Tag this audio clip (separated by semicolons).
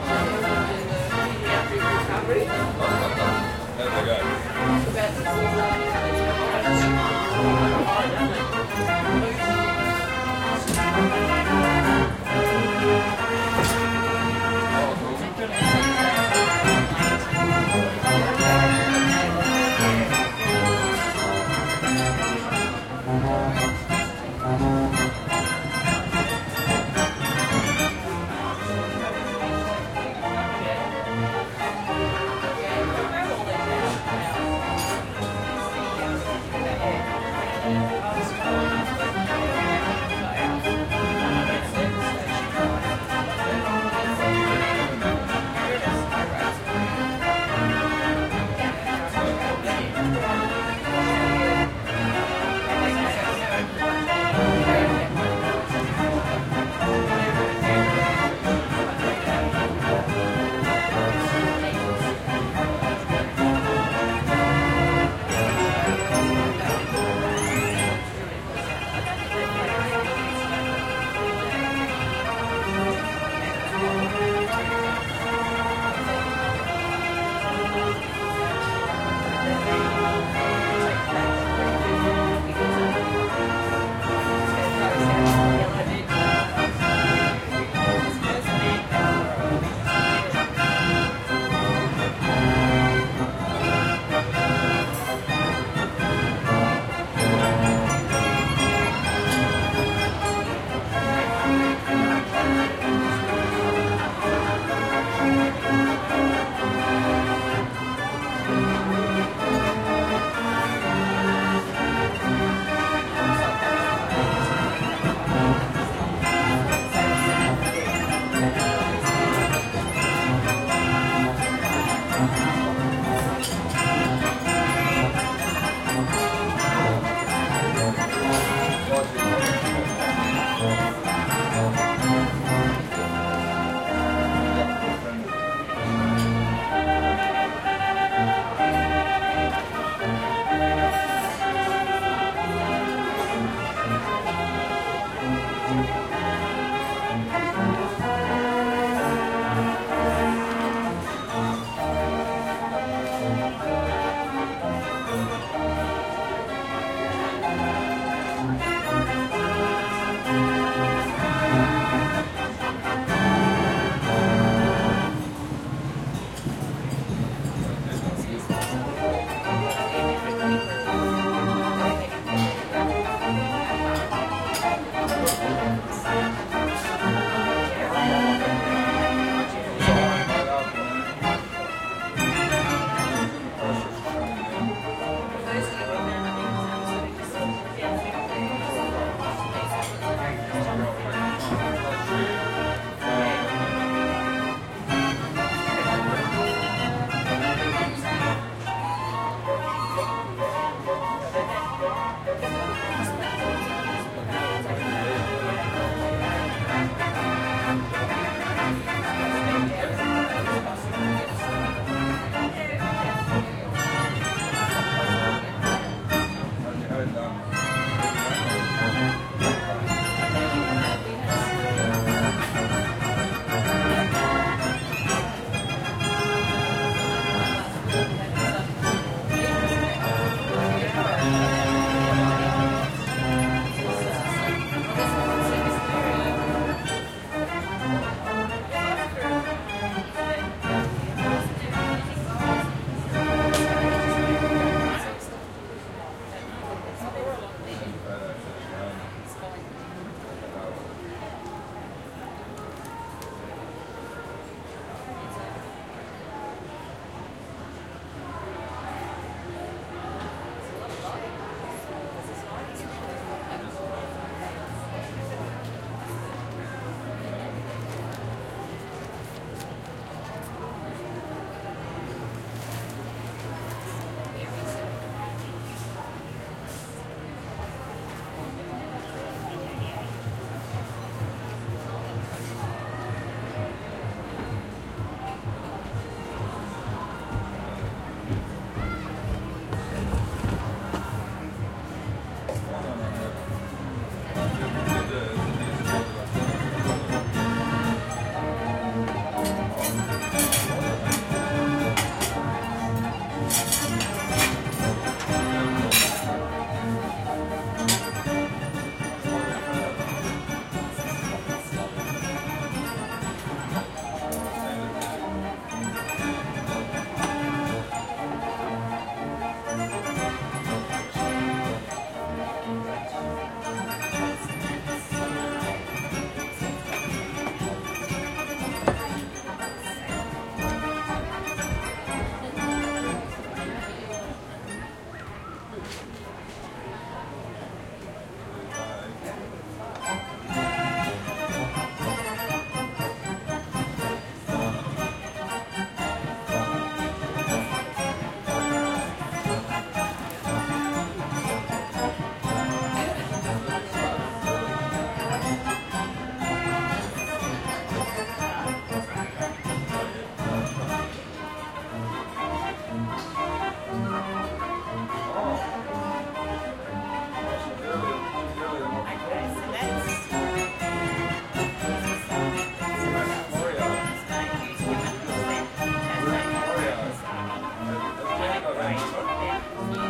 crowd; music; families; outside; organ